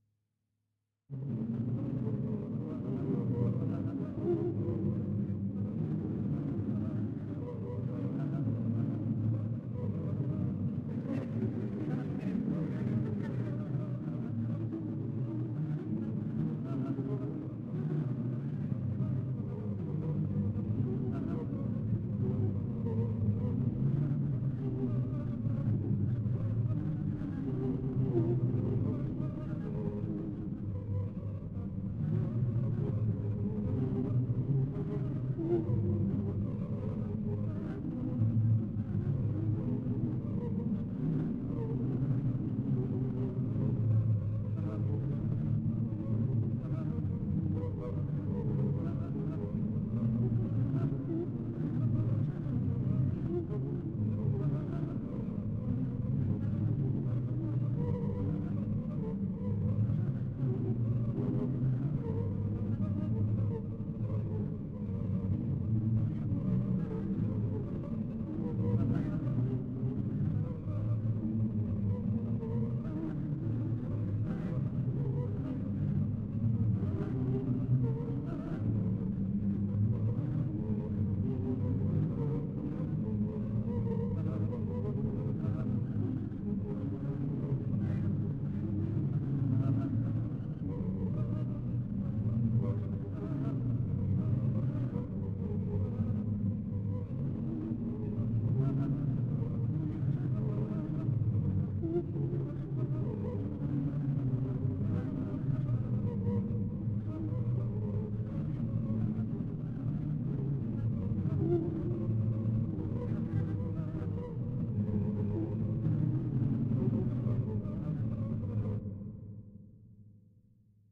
murmurs 06 march 2010
Atmosphere of alien voices.
alien, atmosphere, electronic, murmur, robot, soundscapes, synthetic, voice